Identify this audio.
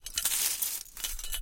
Broken glass shuffled and gathered on a felt mat. Close miked with Rode NT-5s in X-Y configuration. Trimmed, DC removed, and normalized to -6 dB.
broken, glass, noisy, shuffle